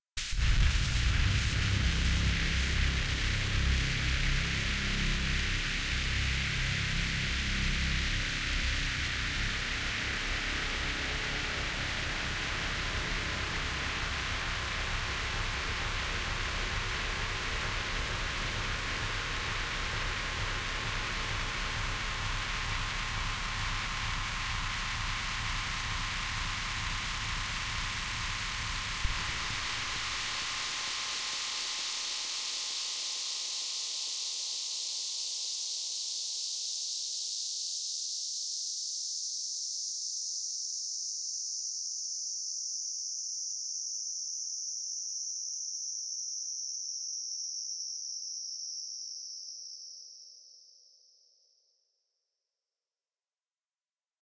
synthetic industrial sounddesign